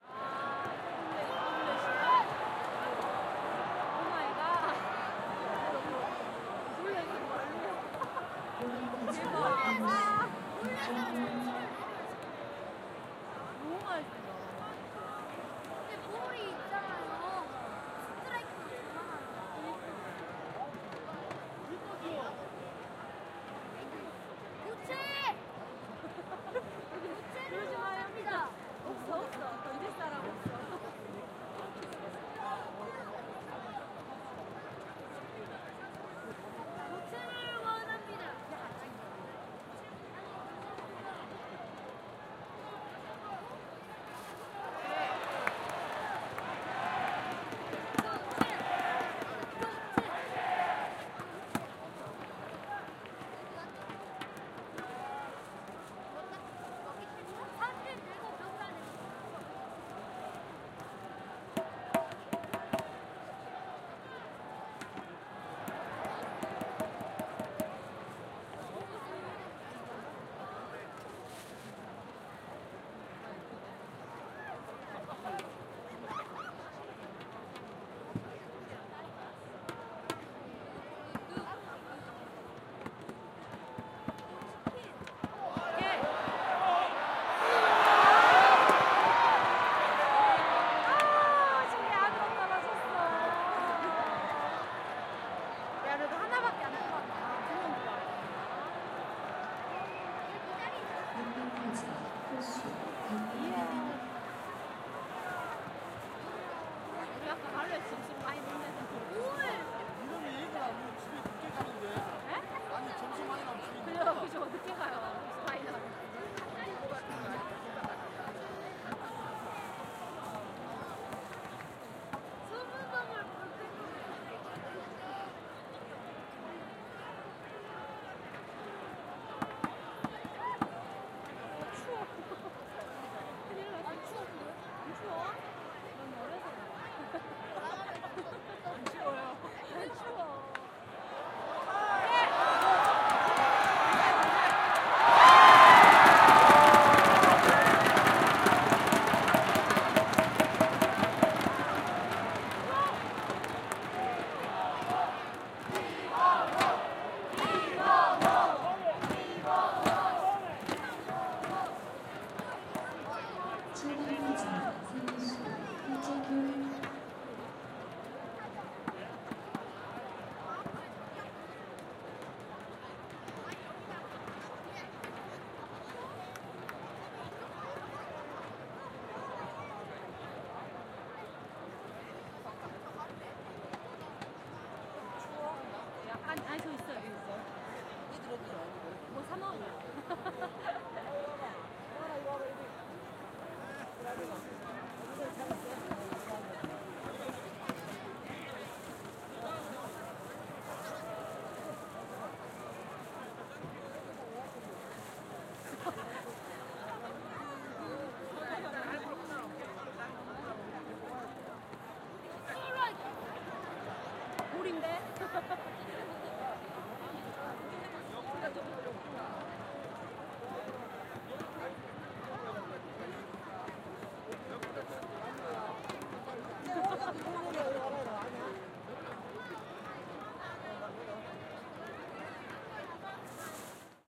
korea baseball
In the baseball-Stadium in Gwangju, Korea. A Game between KIA Tigers and the Team of LOTTE.
applauding, clapping, sport, game, binaural-recording, claps, field-recording, people, cheering, baseball, shouting, clap, cheers, applause, shout, applaud, crowd, audience, fans, group, cheer